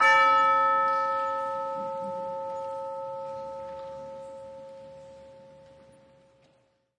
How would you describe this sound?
TUBULAR BELL STRIKE 001
This sample pack contains ten samples of a standard orchestral tubular bell playing the note A. This was recorded live at 3rd Avenue United Church in Saskatoon, Saskatchewan, Canada on the 27th of November 2009 by Dr. David Puls. NB: There is a live audience present and thus there are sounds of movement, coughing and so on in the background. The close mic was the front capsule of a Josephson C720 through an API 3124+ preamp whilst the more ambient partials of the source were captured with various microphones placed around the church. Recorded to an Alesis HD24 then downloaded into Pro Tools. Final edit in Cool Edit Pro.